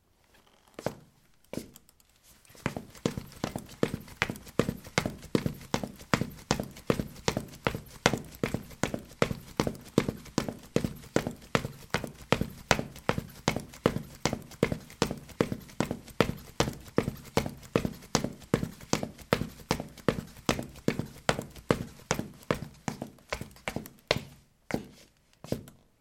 ceramic 17c boots run
Running on ceramic tiles: boots. Recorded with a ZOOM H2 in a bathroom of a house, normalized with Audacity.
footsteps, footstep, steps